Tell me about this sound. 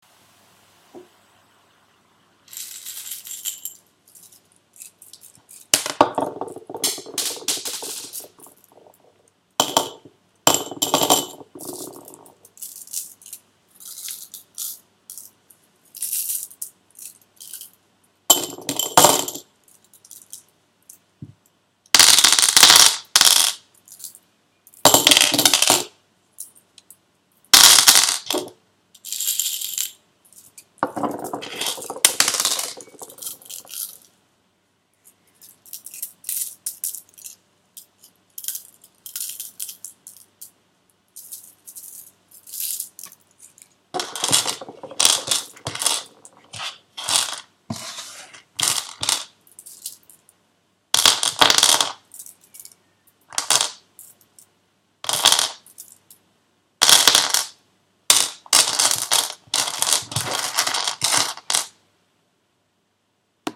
The sound of small stones
small, sound